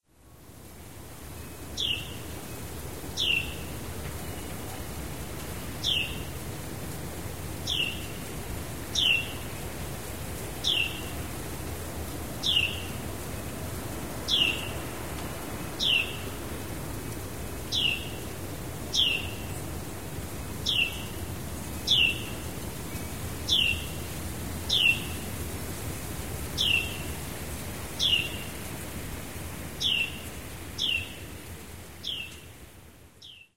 This Carolina Wren was braving a very cold, gusting cold front in early January. Recording made in a small midwestern town using my Zoom H4-N recorder and its own internal microphones set at a record volume of 80.
**Note that since there were some strong north winds, you will hear the wind/roar in the background, but this Wren just seemed oblivious to it.
Thryothorus-ludovicianus, field-recording, forest, woods, birdsong, nature